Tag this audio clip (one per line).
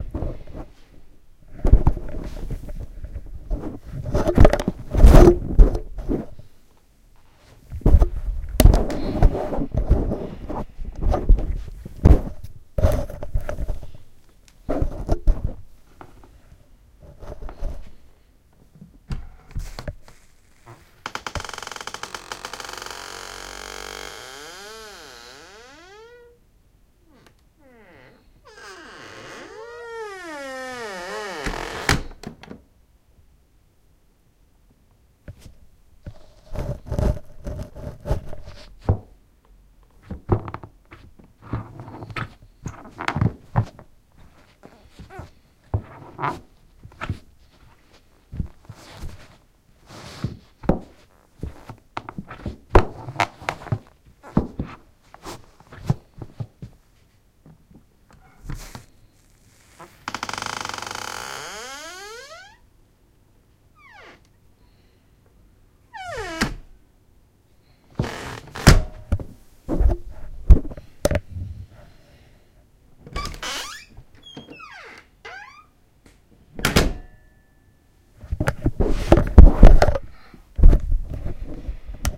door
house
london
old
sound